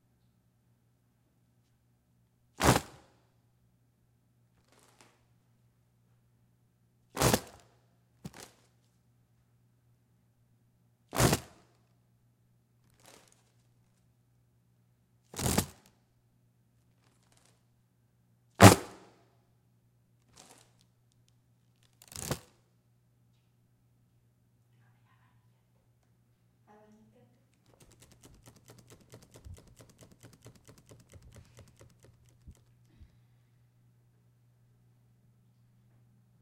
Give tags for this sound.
air; fan; ventilation

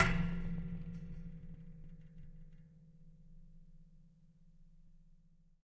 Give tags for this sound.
metalic; flick; smack; acoustic

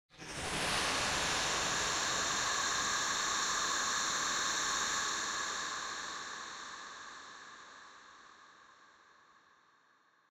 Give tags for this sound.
dark; experimental

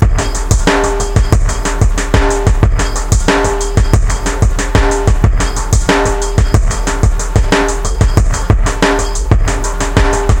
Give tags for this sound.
beat; drumloop; electronic

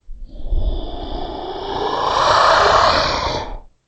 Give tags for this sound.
beast; creature; growl; growling; monster; roar; vocalization

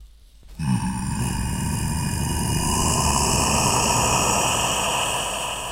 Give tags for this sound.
dark; deep; demon; male; voice

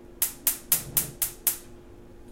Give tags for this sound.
kitchen; stove

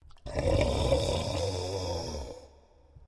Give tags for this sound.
Zombie; Scary; Horror

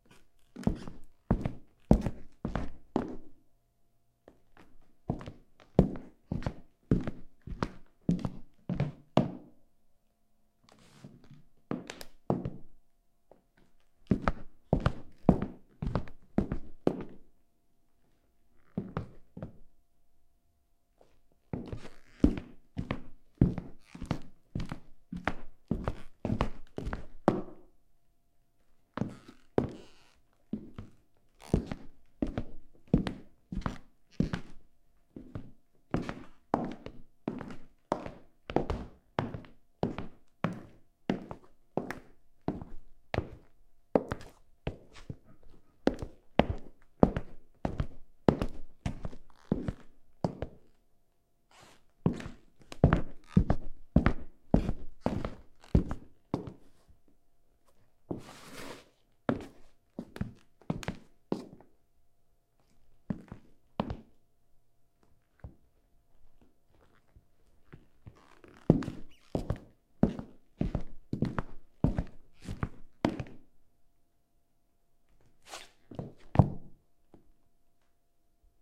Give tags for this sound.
shoes; feet; foley; floor; walk; steps; hardwood

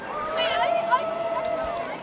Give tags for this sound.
ambient; chat; crowd; field-recording; parade; people

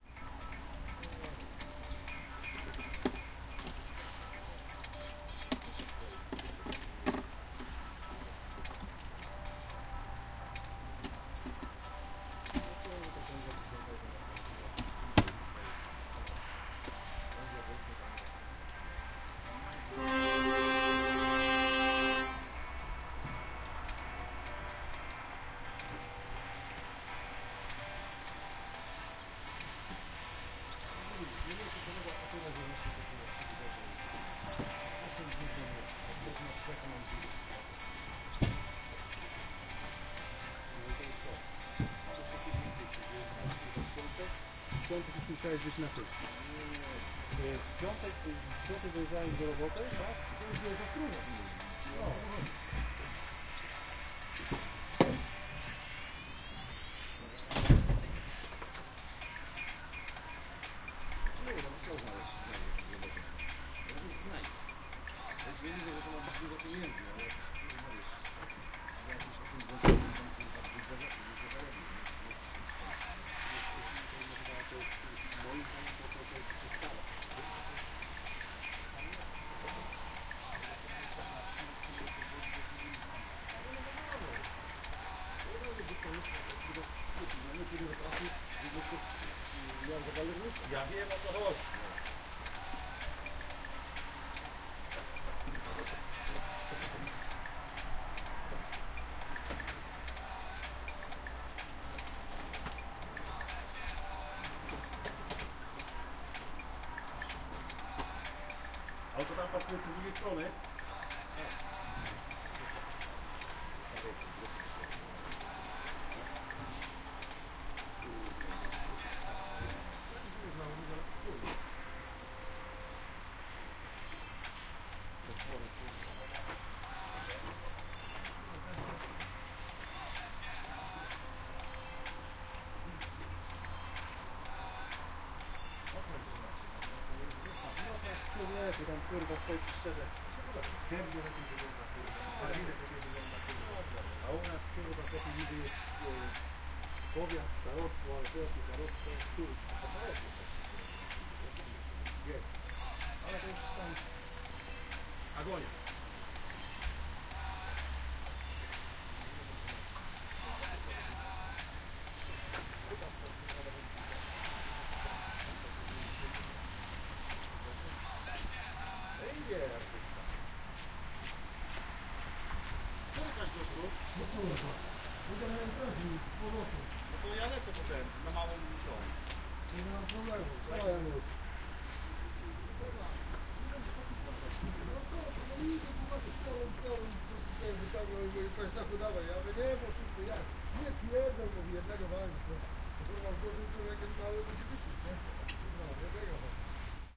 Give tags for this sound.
voices; music; hiss; motorway; conversation; people; horn; sizzle

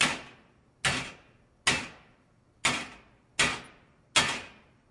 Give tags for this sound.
track; metal; bang

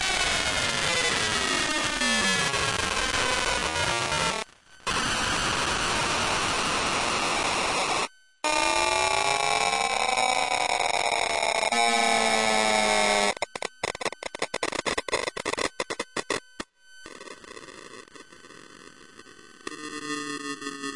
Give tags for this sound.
processed; noise; harsh; data; glitch

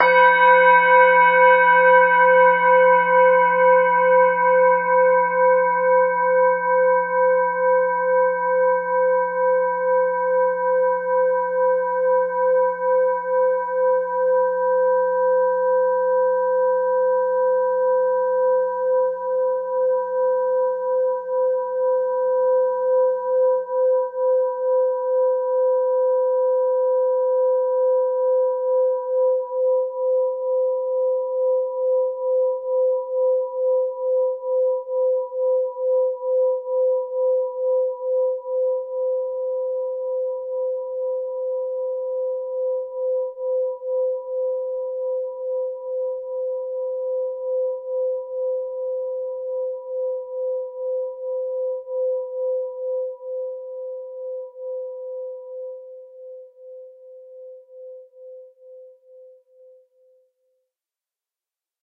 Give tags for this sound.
ding; metallic; ethnic; singing-bowl; nepalese-singing-bowl; tibetan-singing-bowl; bell; bowl; percussion; metal

